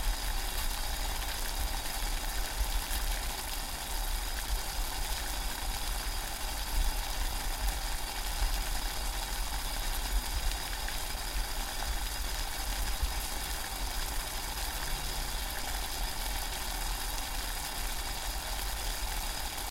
Sound of boiling water.
Bubbles, Boiling, Cooking, Tea, Splash, Water